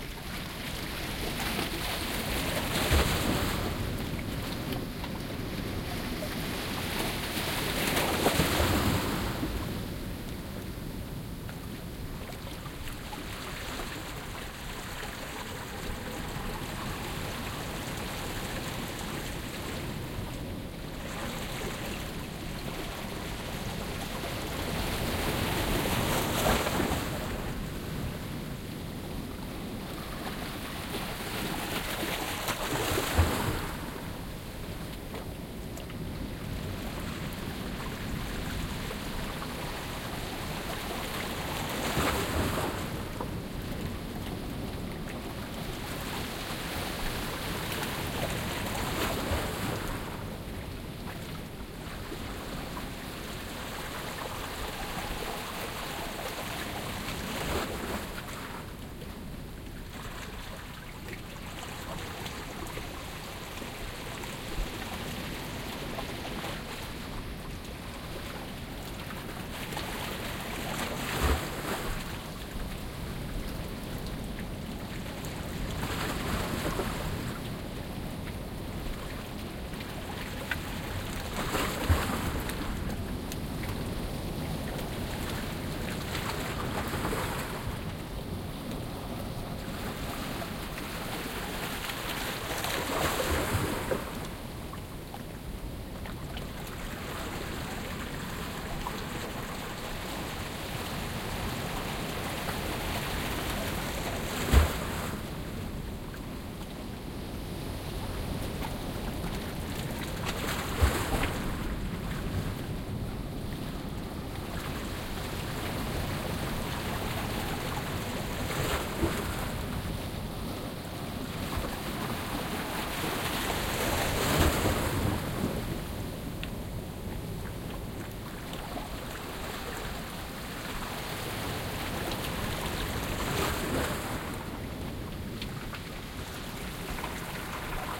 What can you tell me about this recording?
javea mar rocas y canalito cercano2
Mediterranean sea recorded on a flat rock shore (Jávea, May, midnight, calm weather). There are distant waves and the water streaming through a small canal in the rocks. We can hear the streaming water flowing close.
Binaural recording (head-worn Soundman OKM II Studio Klassik + A3, zoom h4n recorder)
binaural, mediterranean, rocks, sea, streaming, water, waves